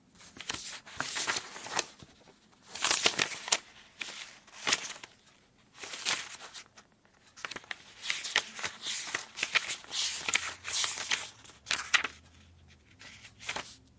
Flipping Through Papers 1
edited, foley, free, h5, high, quality, sample, sound, zoom, zoom-h5